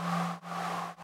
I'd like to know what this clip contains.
sound of my yamaha CS40M analogue